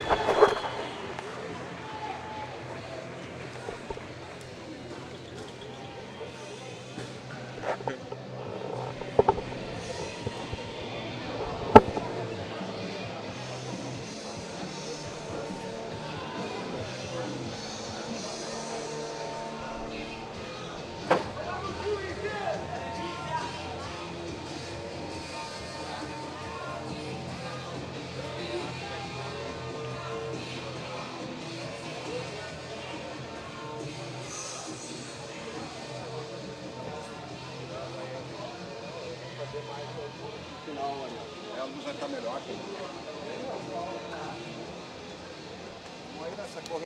TRATADA190127 0783 ambiencia campo
Stadium Field Recording